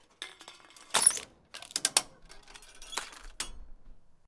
Crushing an aluminum can in the backyard with our can crusher.